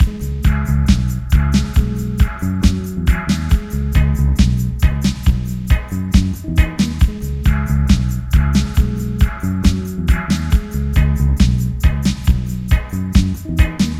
Crub Dub (All)
Roots Rasta Raggae 137.00 bpm
Raggae, Roots, 13700, Rasta